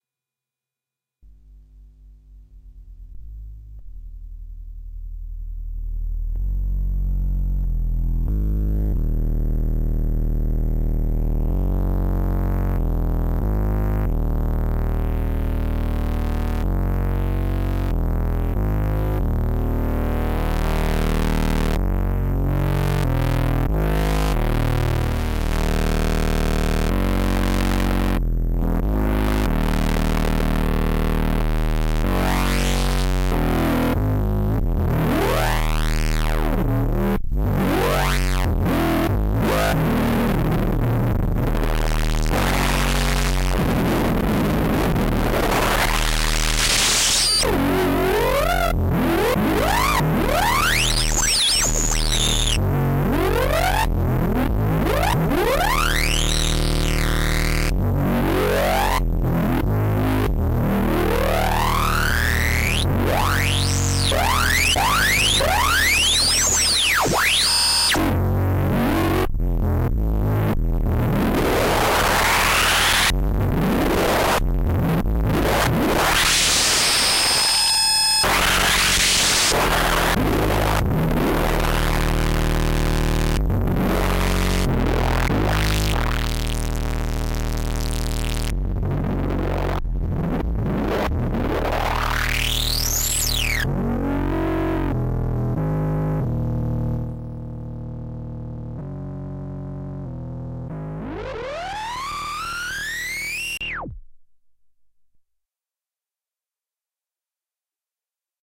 aphex twin inspired bass sample